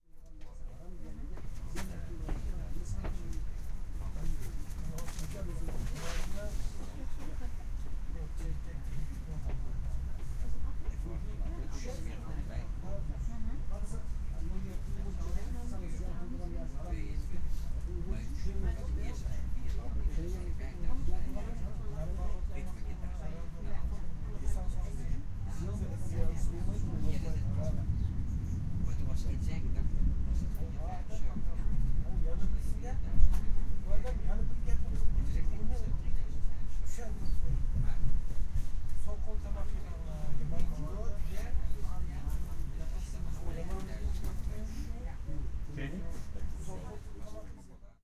120127 0000 train-interior-conversations
Inside a train that leaves a station. People are mumbling, the train is rumbling.